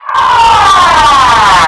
voice processed 2
this is my voice into an sm58 that has been processed over and over into a korg kontrol synth and edited extensively in logic
voice, weird, sample, trigger, synth